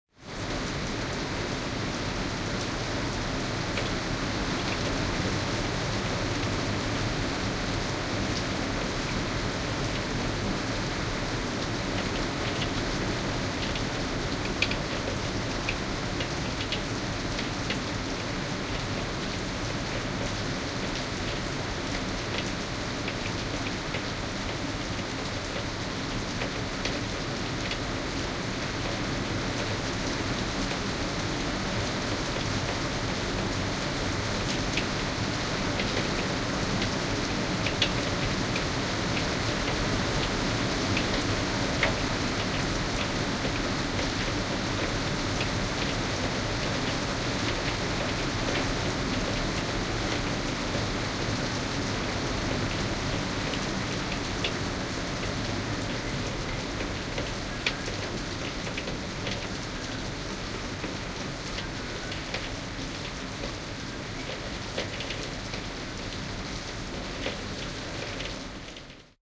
binaural lmnln rain inside
Binaural recording of rain recorded from inside an office-building in the city of Utrecht.
atmosphere, binaural, noise, rain